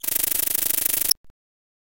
insect noise 010
A short electronic noise loosely based on small winged insects.